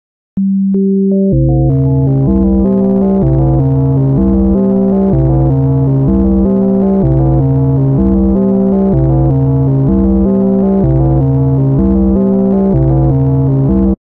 Very strange effect that I can't describe quite well... "Whirlwind of sine waves"
ambient, power